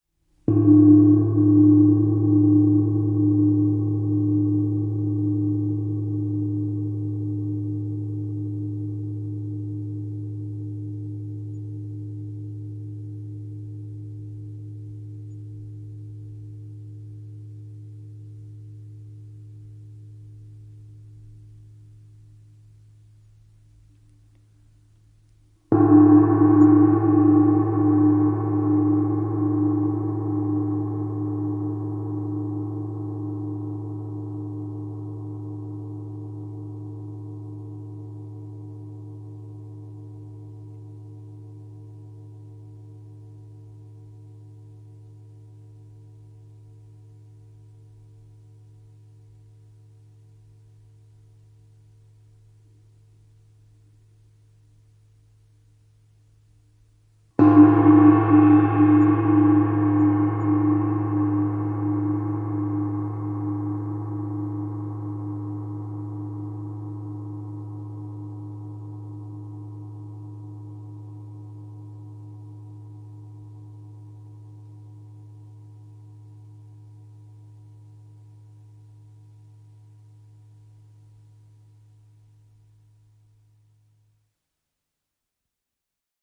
Gongi, lyönti / Gong, 51", metal, low, three hits, each becoming louder
Gongi, 51", metalli, matala ääni, kolme lyöntiä, voimakkuus kasvaa.
Äänitetty / Rec: Analoginen nauha / Analog tape
Paikka/Place: Yle / Finland / Tehostearkisto, studio / Soundfx archive studio
Aika/Date: 1987